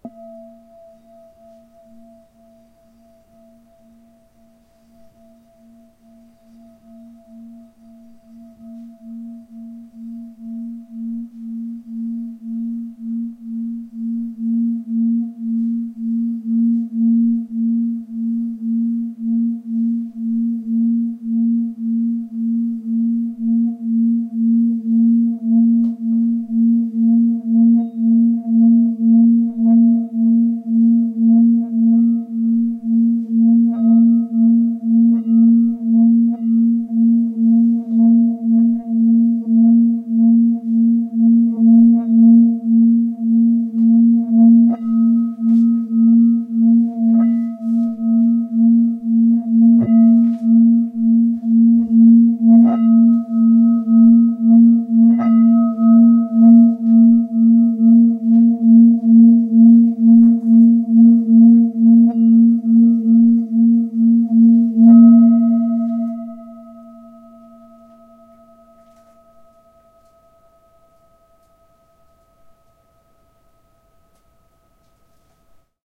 singing-bowl-leather02
Another recording of the same singing bowl played with the leather part of the mallet. While it gives a rather special bass sound, the leather part is supposed to be the handle of the mallet, so I'm not sure it's meant to be played this way, at least in a traditional sense.
thalamus-lab, harmonic, singing-bowl, tibetan-bowl, bowl